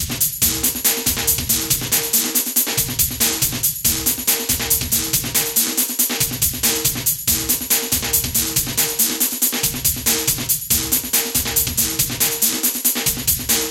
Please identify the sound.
Stereo DnB (1)
Made with Yamaha MOTIF classic, mixed with Waves Platinum in ACID Pro7.0.
140BPM msec conversion = 107.14,214.29,321.43,428.57,857.14,9.333,2.333,0.583